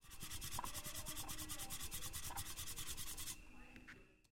Spoon on Pepsi Can 2
a, bottom, can, soda, spoon, tapping